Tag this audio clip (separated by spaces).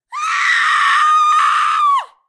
horror woman human scream